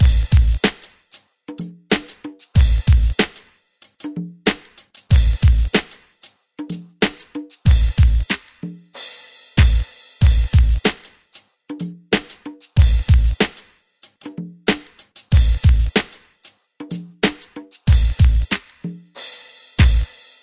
Hip Hop Drum Loop 10
Great for Hip Hop music producers.
hip, hop, loop, sample, drum, beat